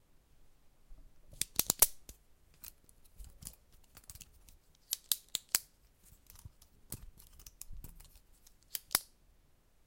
Writing and manipulation with a ballpoint.